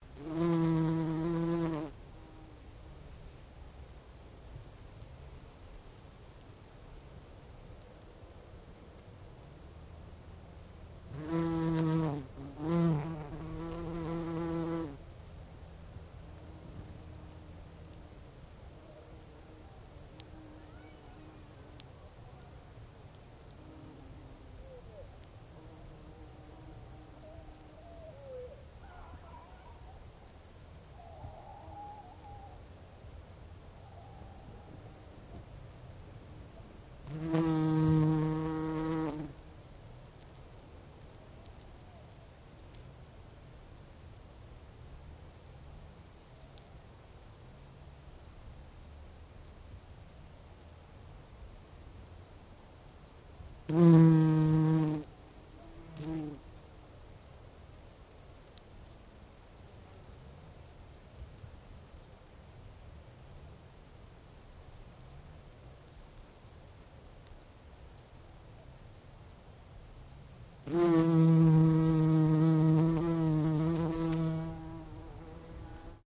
This is mono recording of a bumblebee flying from one flower to another, doing his/her regular daily job.